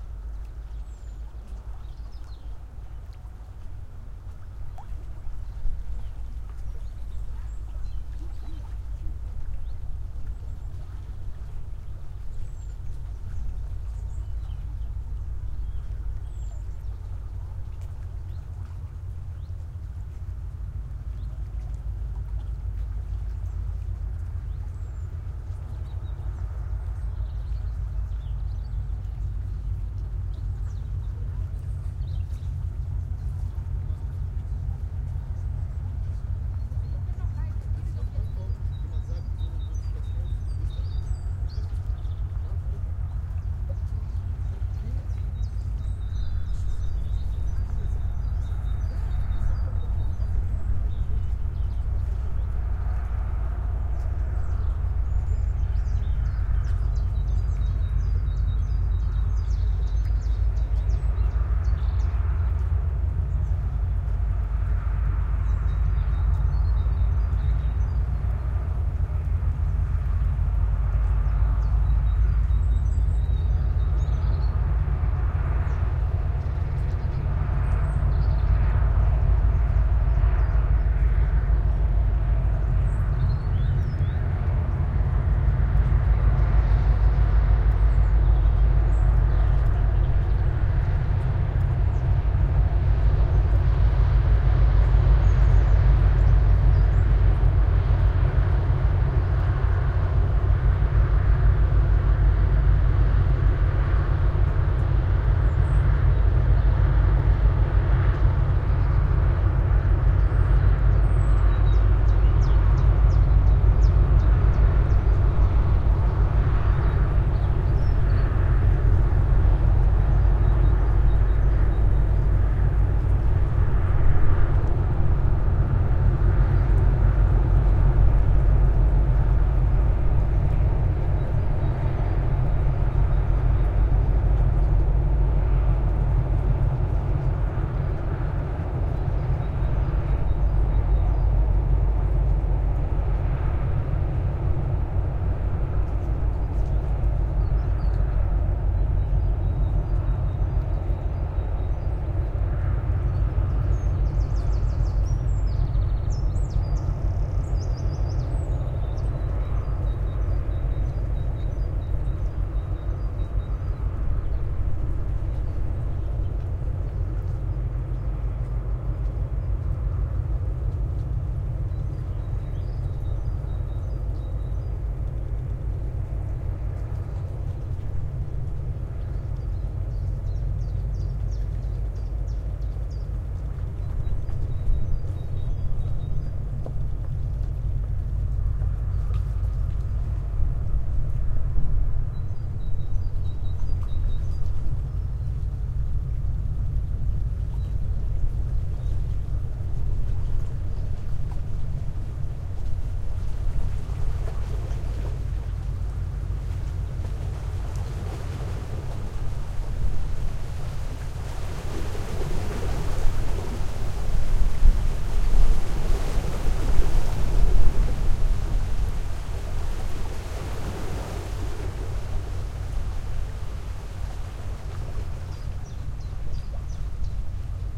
cargo ship on the river Elbe
Recording of the "City of Hamburg", a ship transporting airbus planes, sailing on the river Elbe near Hamburg towards the sea. You can hear the hum of the engine, followed by some waves. Inside mics of the Sony PCM-D50.
Here is a picture: